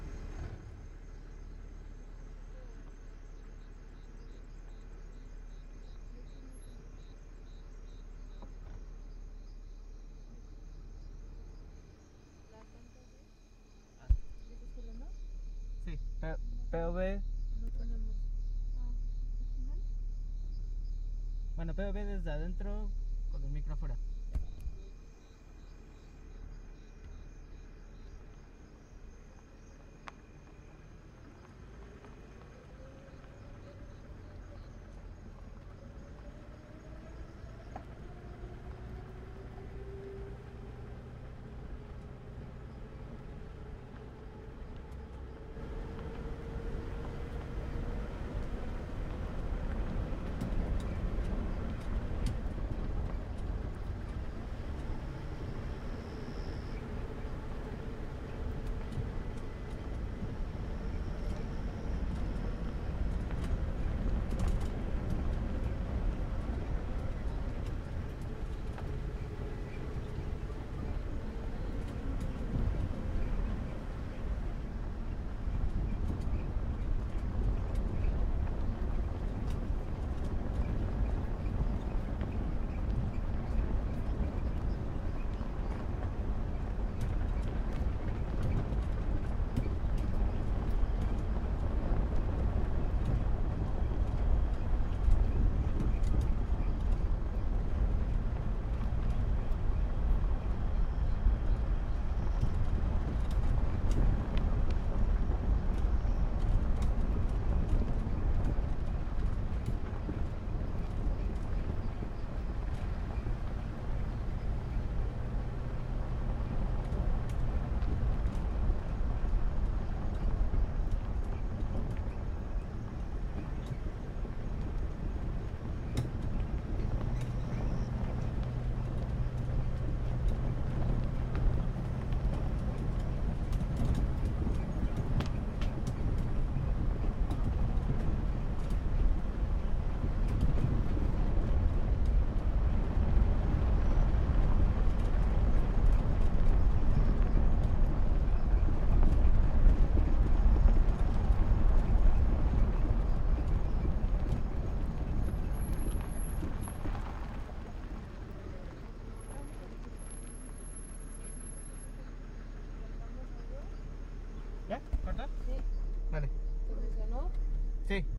SUV DIRT ROAD POV
Recording of a SUV passing a dirt and rocky road with lots of rattling. Recorded with the mic going out the window. Exterior night with faint crickets in the background.
Sound Devices 744T
Sanken CS3 E